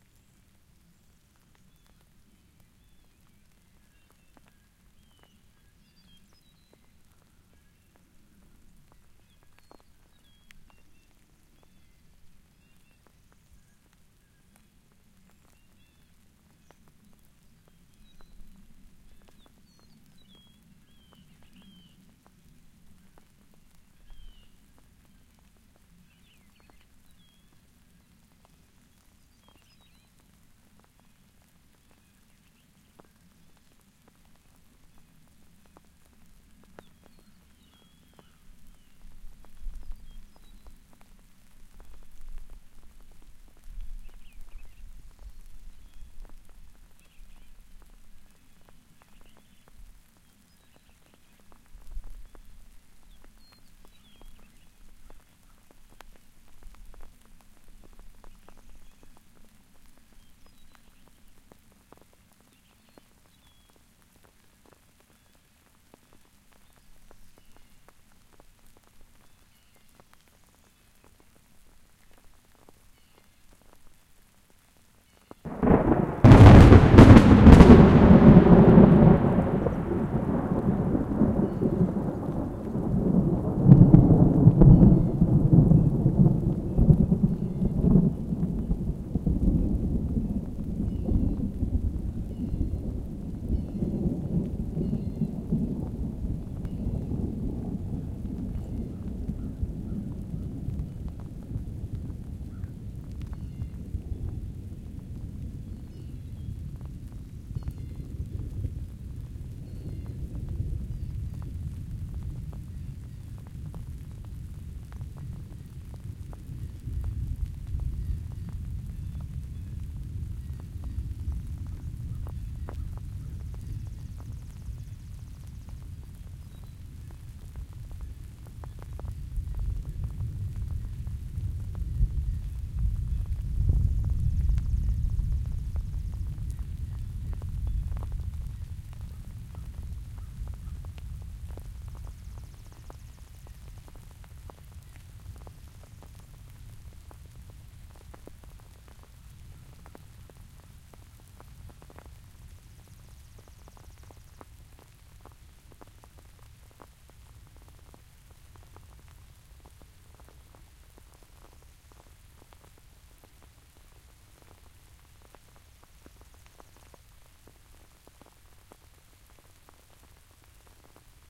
Huge Thunder
We were having a small rainstorm, so I thought I'd go out and record the rain. As you can see, (or hear!), I got much more than that! This is the complete original audio, all unedited. It sounds as if the lightning struck at least three times! Enjoy! (I know I do!)
boom, field-recording, huge, lightning, nature, thunder